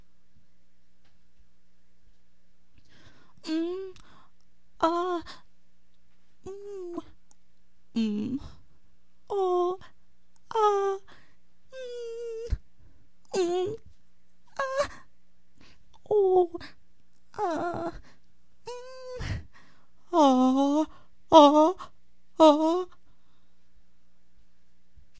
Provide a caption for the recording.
sweetness vocalization male meme